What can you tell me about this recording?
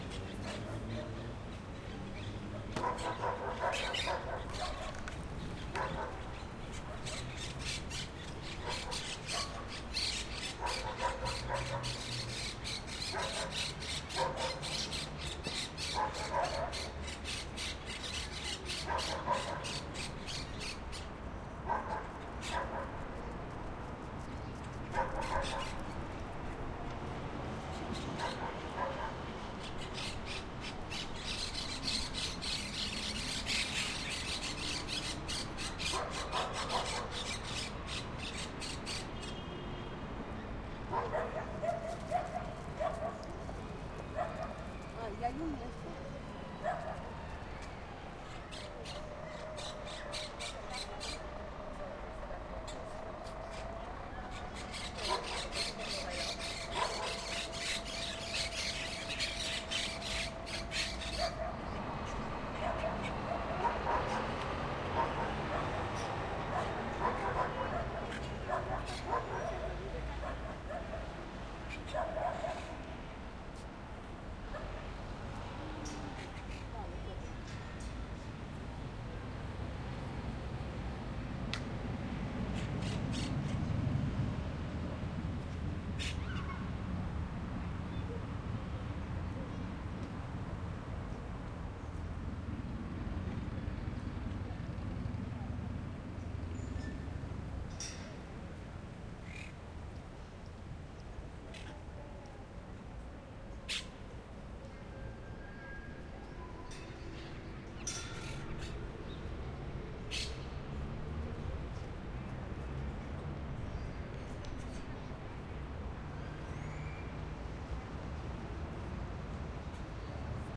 Calidoscopi19 Jardins Elx 1
Urban Ambience Recorded at Jardins d'Elx in April 2019 using a Zoom H-6 for Calidoscopi 2019.
Pleasant, Traffic, Monotonous, Sagrera, Humans, Quiet, Nature, Simple, Calidoscopi19, SoundMap